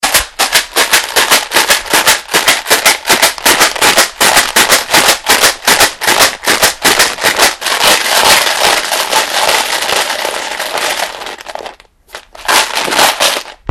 Sonicsnaps LBFR Valentin
france, labinquenais, rennes